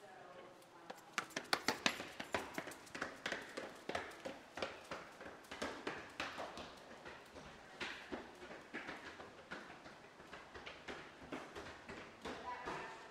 Two people jogging up tile stairs indoors
footsteps, narrative, sound